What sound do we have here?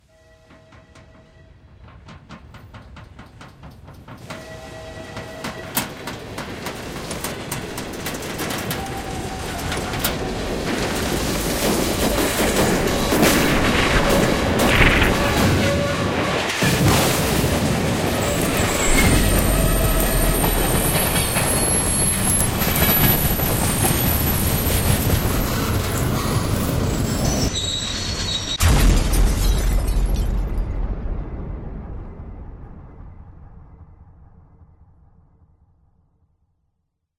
Train Crash Simulation
This simulation was created out of screeching, rumbling, train passing by and synthetic additions.
by, locomotive, clatter, rail, pass, super8, train, explosion, rumble, wheels, riding, screech, disaster, simulation, rail-road, rail-way, crash, railway, rattle